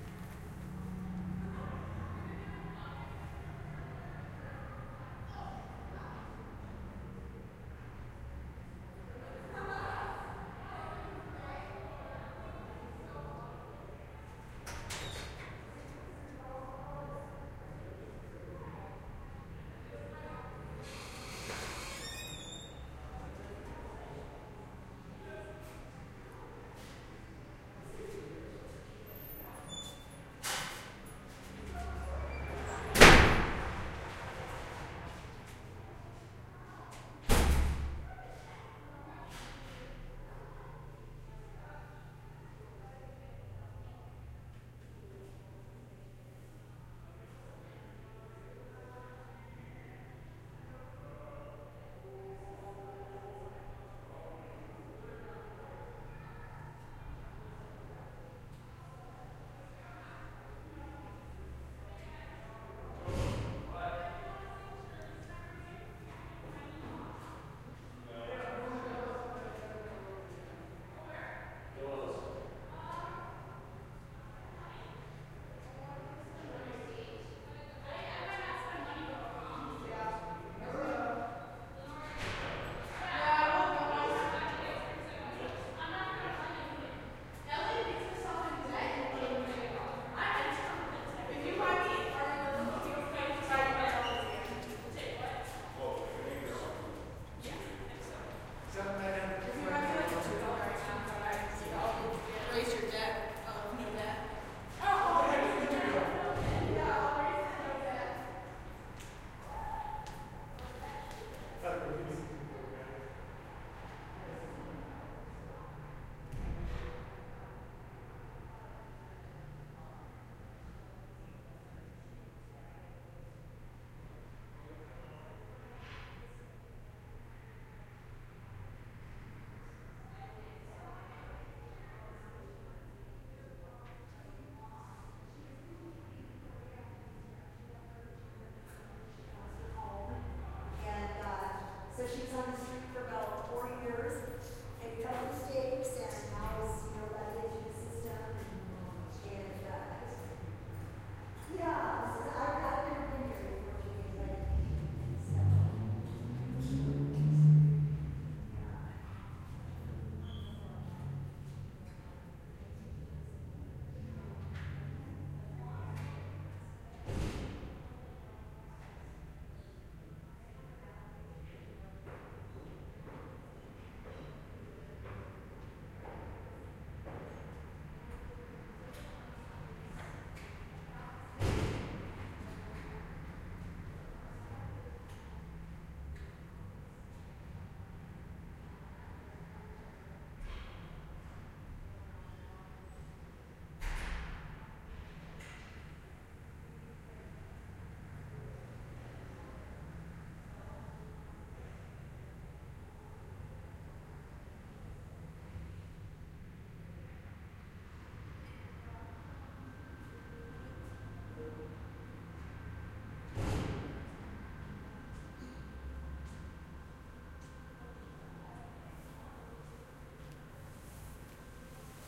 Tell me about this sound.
hall ambience
Ambience of a echoey open space shared between a few small stores
ambience, ambient, building, conversation, crowd, echo, mall, people, room, store, talking, tone